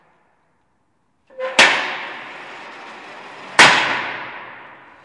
Cell door 4
Sounds recorded from a prision.
cell, close, closing, door, doors, lock, metal, open, opening, shut, slam